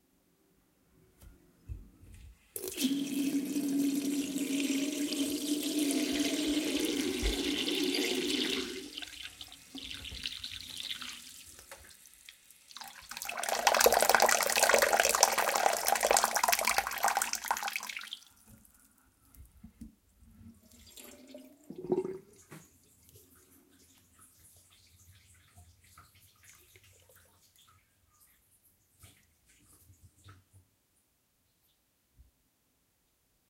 Recording of a tap pouring water, very satisfying sound.
Captured using a Shure Motiv MV88.
Processed using iZotope RX Denoise.